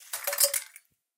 One of almost 300 sounds from the FREE Breaking Glass Sound Library.
Check the video from the recording session:
broken, debris, smashing, smash, glass, shatter, breaking